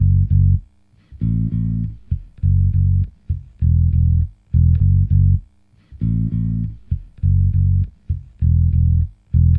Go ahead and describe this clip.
FunkBass GrooveLo0p Gm 1
Funk Bass Groove | Fender Jazz Bass
Bass, Funk-Bass, Beat, Ableton-Bass, Fender-Jazz-Bass, Compressor, Fender-PBass, Bass-Loop, Bass-Samples, Logic-Loop, Synth-Bass, Bass-Recording, Funk, Soul, Jazz-Bass, Synth-Loop, Groove, Bass-Groove, Drums, New-Bass, Hip-Hop, Funky-Bass-Loop, Ableton-Loop, Bass-Sample, Fretless, Loop-Bass